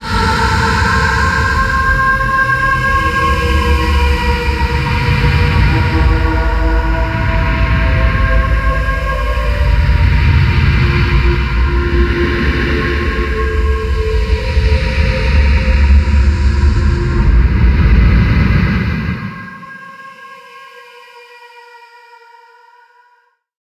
just a new atmospheric-type ambiance sound effect. this one is a bit shorter than the rest... ill make some new ones some time soon.
sound-design, ambience, echo, ambiance, fx, sound-effect, ambient, technique, deep, effect, breath, soundscape, horror, soundeffect, electronic, atmosphere, voice, vocal, processed, experimental, pad, drone, dark, reverb, noise, long-reverb-tail, bass, sci-fi, delay, thunder